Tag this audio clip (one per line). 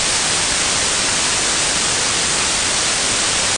tv-noise
general-noise
background-sound
atmosphere
noise
ambience
background
white-noise
ambient